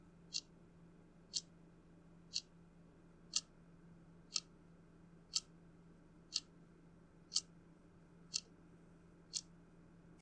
Sound of a clock ticking. Recorded at close range. Slightly cleaned up.